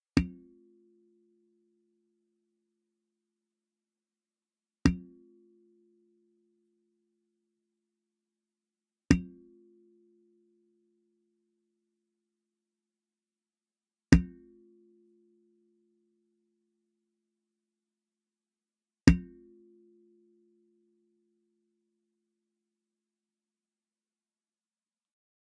hit - metallic resonant
Hitting the base of the microphone stand.
If you want to tell me you've used something I've uploaded, that would be cool. Even better if you want to share a link to it. It's neither expected, nor required.
metallic, resonant, percussion, hit